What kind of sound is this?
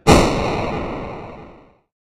Short, low resolution, white noise with volume and filter envelope. 8-bit Atari game explosion.